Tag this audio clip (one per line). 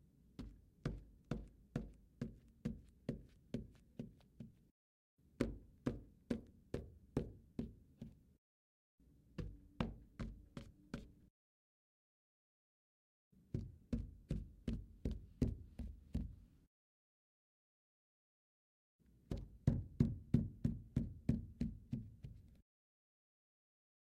stair
floorboards
footsteps
wood
feet
walking
floor
foot